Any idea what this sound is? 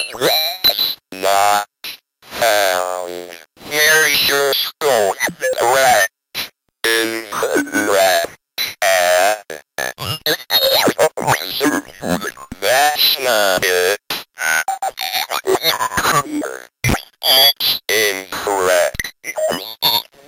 thats no it
YOU LOSE!! one of a series of samples of a circuit bent Speak N Spell.
bent, circuit, circuitbent, glitch, lo-fi, lofi, speak, spell